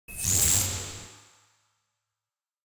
A cheap Behringer Mixer and a cheap hardware effects to create some Feedbacks.
Recorded them through an audio interface and manipulated in Ableton Live with a Valhalla Vintage Verb.
Then sound design to have short ones.